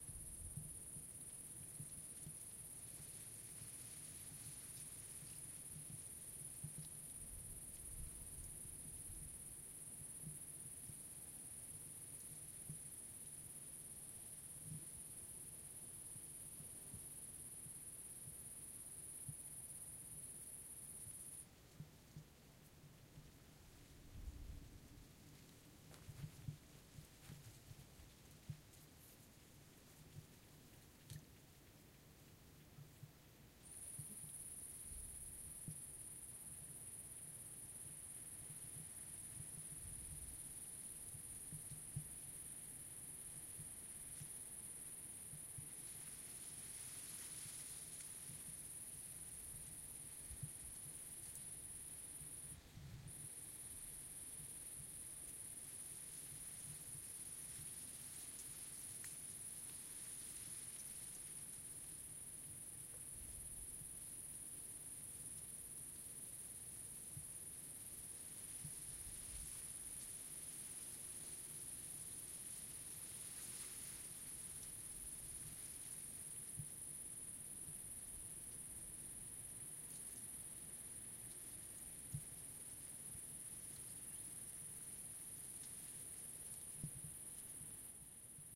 Field ambience with crickets 3
Field ambience with crickets
ambient, crickets, field, field-recording, insects, meadow, nature